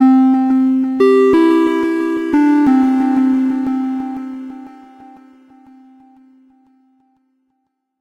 90 bpm ATTACK LOOP 3 square sine melody 1 mastered 16 bit
This is a component of a melodic drumloop created with the Waldorf Attack VSTi within Cubase SX.
I used the Analog kit 1 preset to create this loop, but I modified some
of the sounds. It has a melodic element in it. The key is C majeur. Tempo is 90 BPM.
Length is 2 measures and I added an additional measure for the delay
tails. Mastering was done within Wavelab using TC and Elemental Audio
plugins.
square, electro, 90bpm, sine, melodyloop, loop, melodic